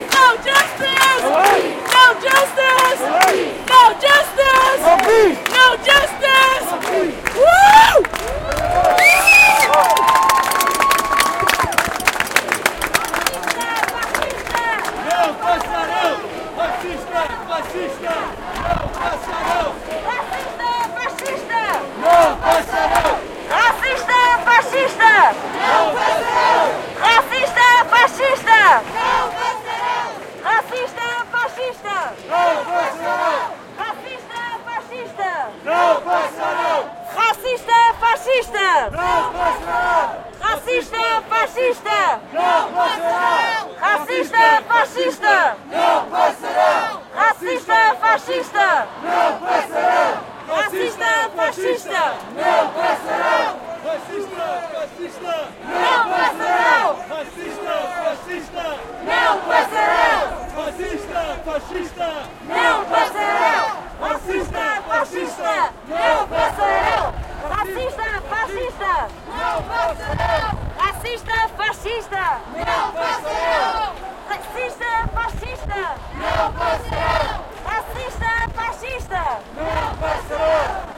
BLM 1 Protest Lisbon Portugal June 2020
Sounds from the Black Lives Matter protest in Lisbon June 2020.
Recorder: Zoom H6N